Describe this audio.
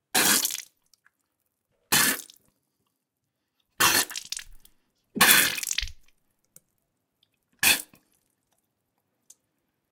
Spitting Blood 01
Recording of spitting liquid. Recorded using a Sennheiser 416 and Sound Devices 552.